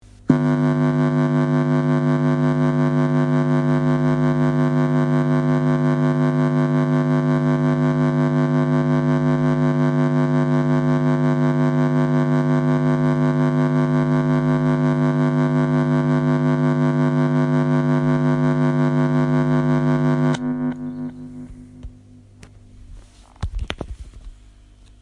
Tremolo Feedback 1
amplifier, buzz, distortion, effect, electric, electronic, feedback, freaky, guitar, hum, machine, microphone, noise, sound, tremolo, wave, XLR